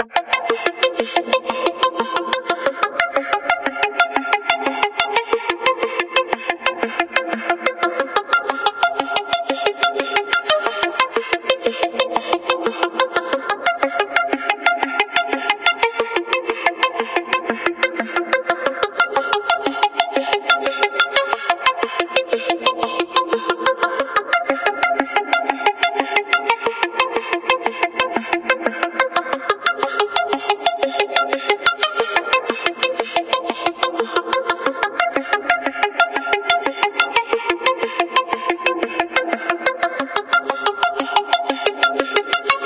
Synthetic Guitar Pluck (180 BPM)
A pluck made on Serum.
Plucked, Guitar, Celtic-Harp, Pluck, Hop, Music, Strings, Bass, Melody, String, BPM, Live, Loop, Ethnic, Hip, Faux, Drums, Electronic, Acoustic, Nylon, Koto, Snickerdoodle, Harp, Beat, Funk, Drum